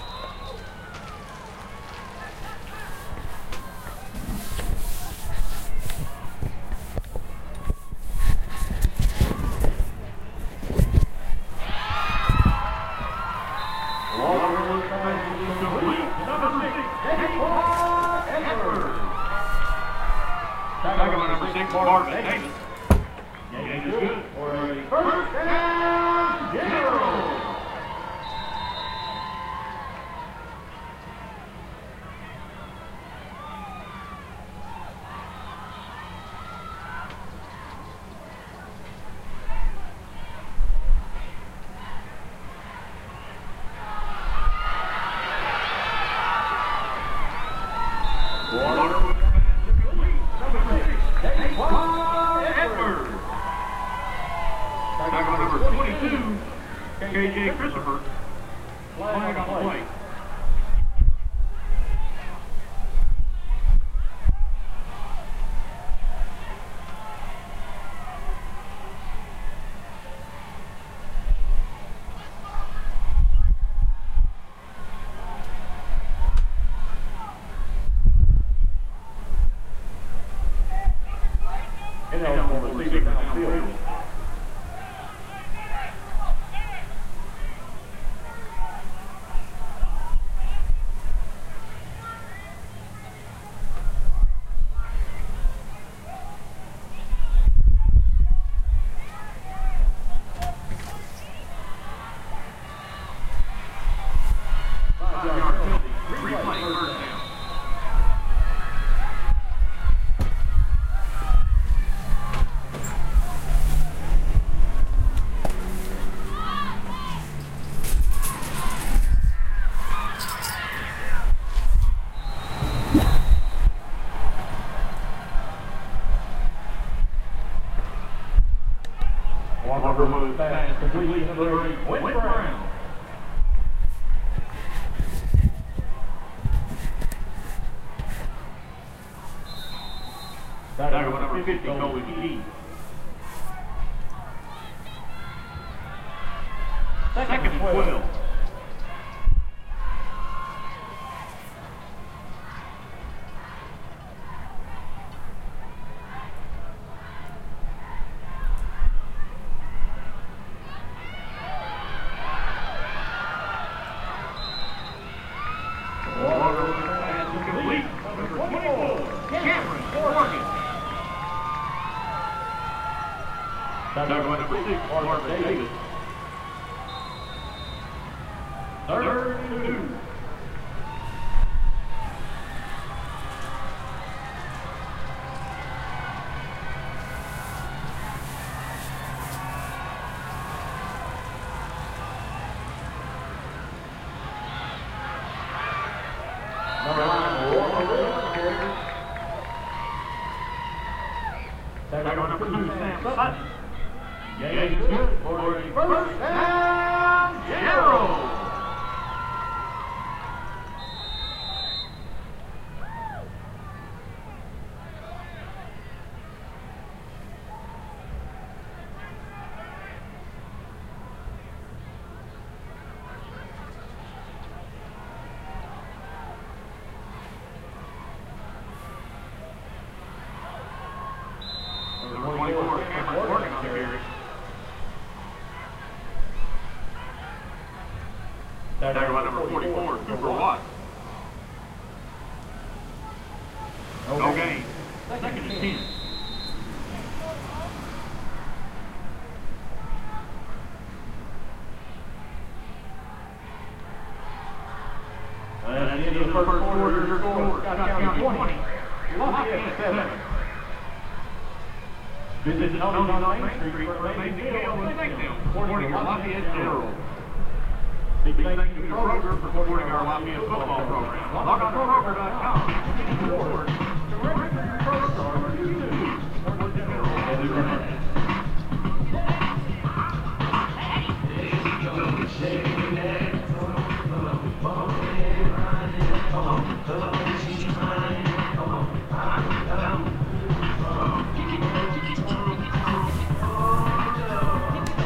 161125-006americanfootball-st
American football in Lexington, Kentucky.
school, crowd